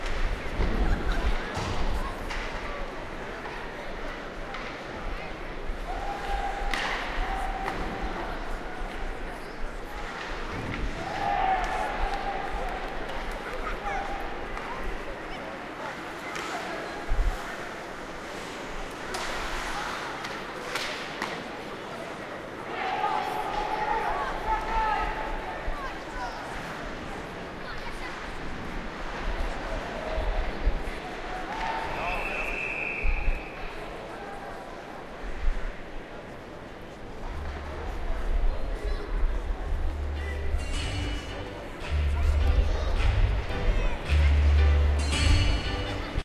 Ambience,HockeyArena,Full
A full stadium of fans watching the game
Hockey, Hockey-Arena, Hockey-Player